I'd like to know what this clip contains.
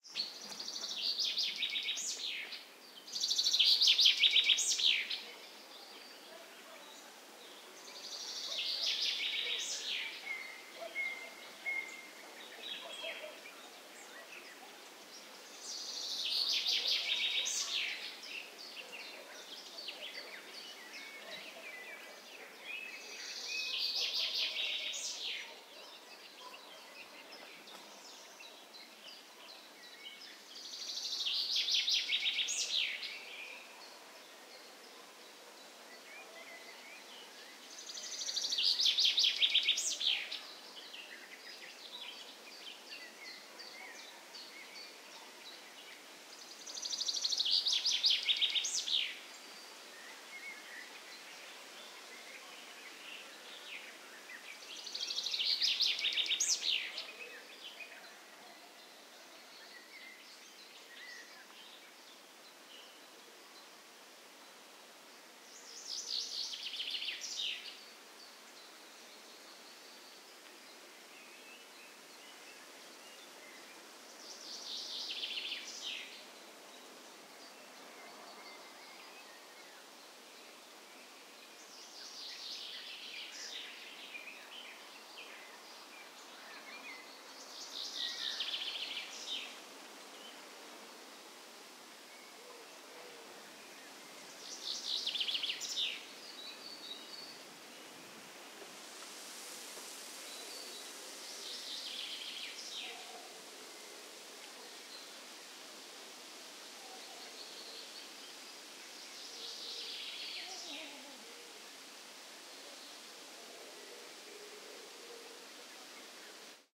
Ukraine forest atmo in May
Forest near river in central Ukraine in May, with birds and dogs barking far far away.
ambience, bird, birds, birdsong, field-recording, forest, morning, nature, spring